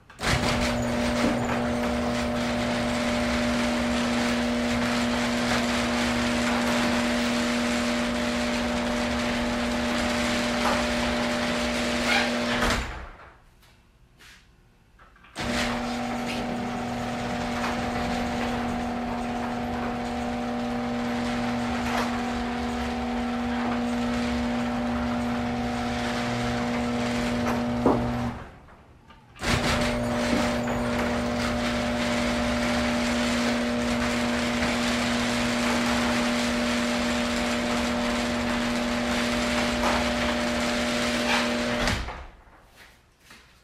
The garage door being open and close.
close, Garage, door, open